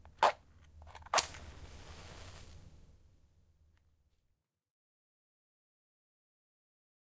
match lit
A match being struck twice and lit on the second - recorded with a Sony ECM-99 Stereo microphone to SonyMD. No effects were added, other than to increase the volume to hear the flame.
field-recording, soundeffect, effect, environmental-sounds-research